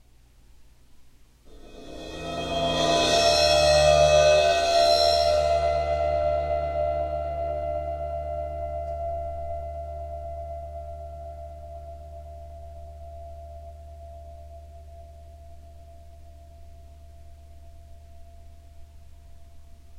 Cymbal Swell 001
bowed cymbal swells
rare 18" Zildjian EAK crash ride
clips are cut from track with no fade-in/out. July 21St 2015 high noon in NYC during very hot-feeling 88º with high low-level ozone and abusive humidity of 74%.
ambiance, ambient, atmosphere, bowed-cymbal, overtones, soundscape